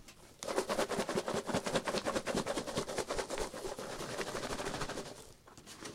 Packing Peanuts Box Closed
Shaking a small box full of packing peanuts, closed.
box, styrofoam-peanuts, peanuts, shaking